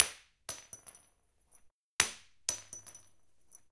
Bouncing lightbulb 2
Dropping a small lightbulb, but apparently not high enough to break. So it chooses to bounce instead.
Recorded with:
Zoom H4n op 120° XY Stereo setup
Octava MK-012 ORTF Stereo setup
The recordings are in this order.
XY, floor, bounce, ORTF, bouncing, XY120, lightbulb, glass